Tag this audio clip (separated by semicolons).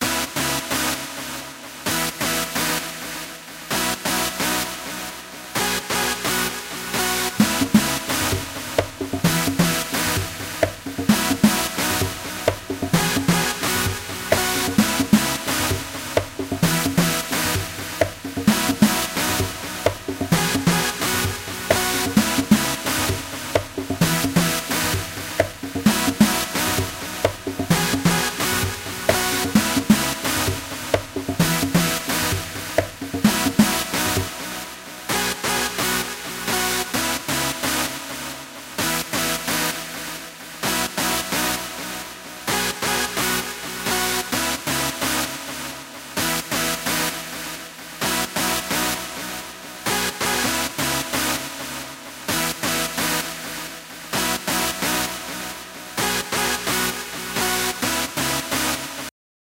awesome; free; music; sounds